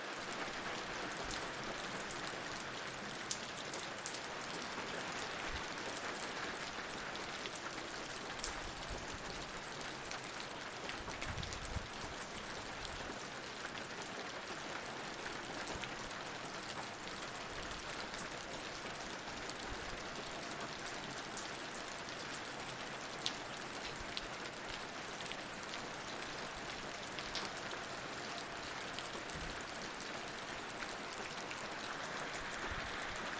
Raining outside, under a porch roof.
nature, outside